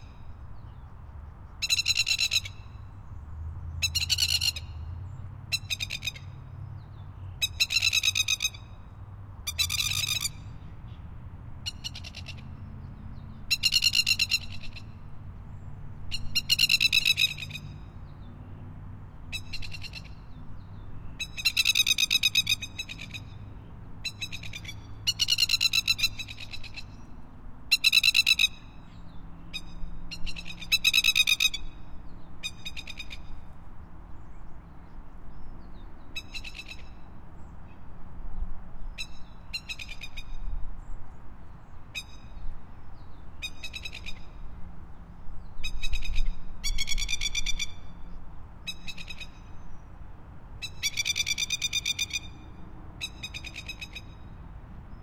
Masked Lapwing #1
Two adult Masked Lapwings protecting their chick hidden in the grass. Recorded on a Marantz PMD 661, 25 August 2021, with a Rode NT4.